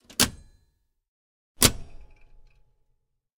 switch big breaker metal click on, off
big, breaker, click, metal, off, switch